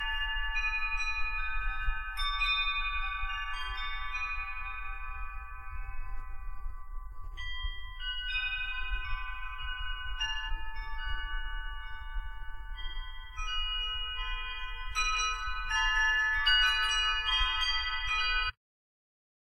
Wind Chimes
Used as a background noise that fills the space. Calming relaxing effect.